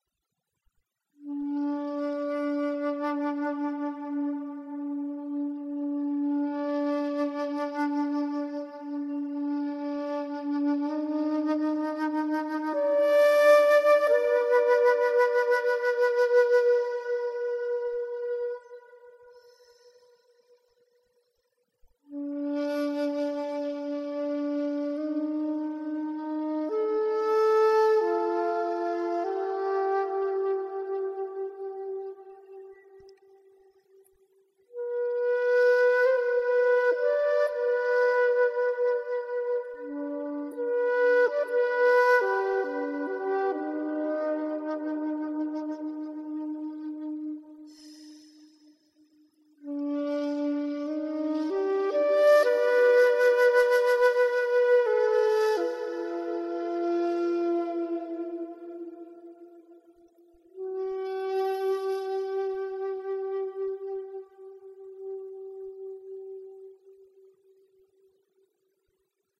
I play a short atmospheric 'echoey' melody on the flute. It has a dreamy mystical vibe.